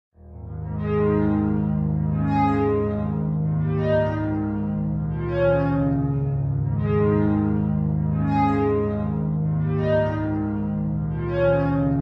Nice Weirdish Melody
A melody I came up with in midi shifting notes around. The sound is from an acoustic guitar note sample of mine with effects, ran through Structure Free in pro tools.
loop, weird